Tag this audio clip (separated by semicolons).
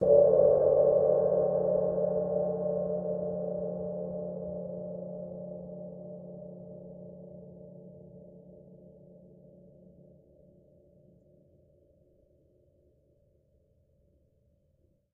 clean; cymbal; splash; crash; percussion; drums; dry; quality; cymbals; zildjian